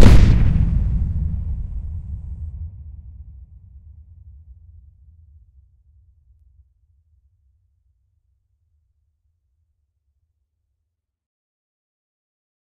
explosion main 2
Search with a browser this phrase: convert newlines to spaces, in order you insert correctly your tags.
I used one original file and two more bass versions, 3 semitones and 12 semitones lower, and I mixed the files on WaveLab allowing digital distortion via the use of MultiBand Compressor > merge all sub-bands into the full spectrum band (do not have lo, mid, hi but instead have all) > Compressor Classic > Soft Clip on and make the sound 0.7 dB louder before rendering.
note: I am a physicalist
The supernatural is not only unknowable and unlearnable, but it is also scientifically non-hypothesizable, because it is not a manifestation of logical procedurality, i.e., it has no ontological foundation/ characteristics of identity/ existence. Supernaturalists describe events as an observer (empiricist-supernaturalist) would see them and not the axiomatic/ logical foundations of any substantiality.
artillery, bang, big-gun, blast, blowing-up, bomb, bombard, boom, cannon, carronade, culverin, detonation, discharge, eruption, explosion, explosive, falconet, field-gun, howitzer, ignition, Long-Tom, mortar, mounted-gun, ordnance, pom-pom, rumble, serpentine, wham